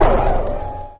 STAB 011 mastered 16 bit from pack 02
An electronic effect composed of different frequencies. Difficult to
describe, but perfectly suitable for a drum kit created on Mars, or
Pluto. Created with Metaphysical Function from Native
Instruments. Further edited using Cubase SX and mastered using Wavelab.
effect, electronic